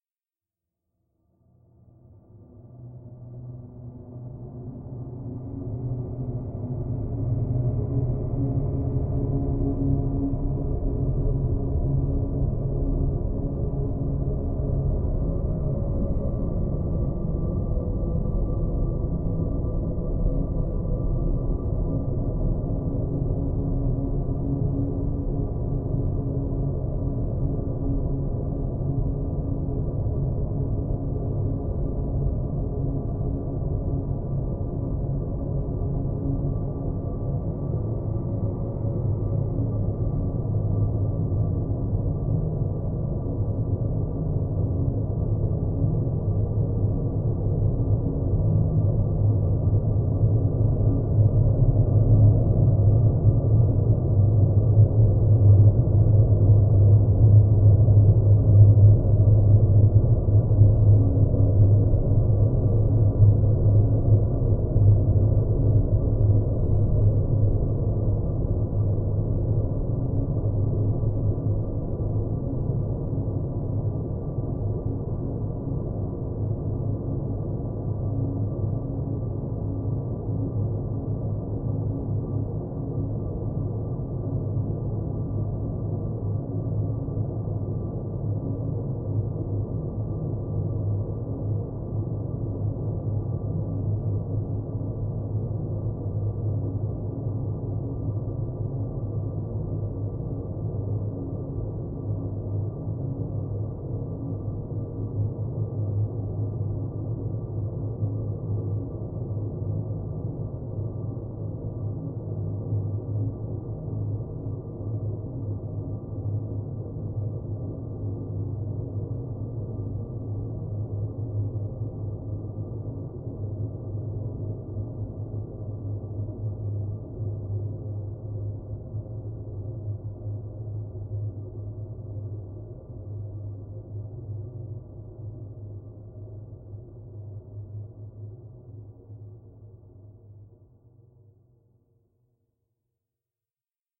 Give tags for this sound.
multisample drone atmosphere ambient

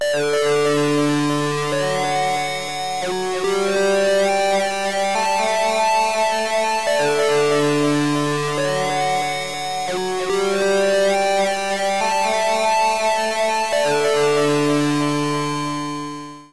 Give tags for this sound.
up random motion trippy synth electro electronic electribe warm tweaking emx-1 mellow sequence analog

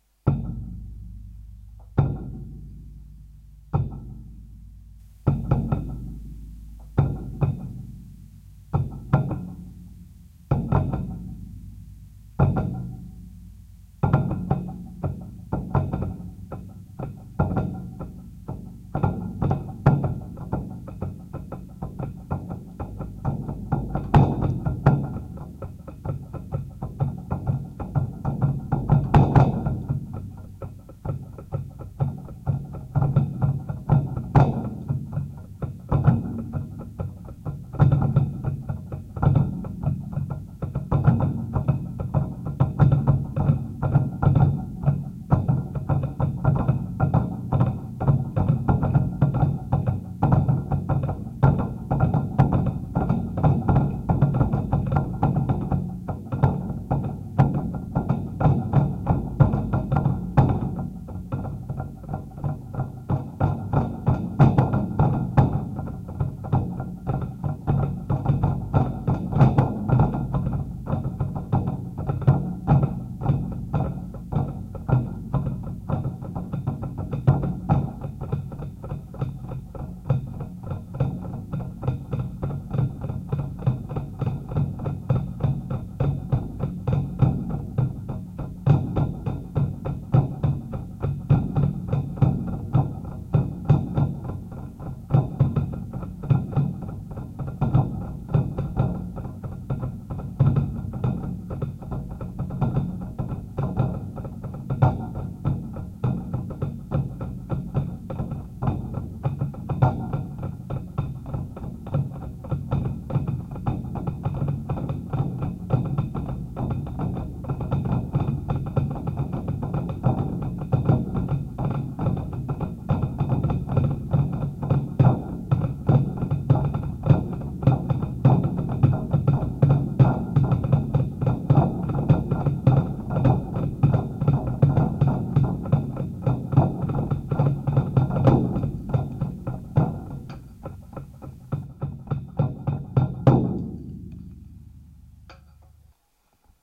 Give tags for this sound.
drums instruments

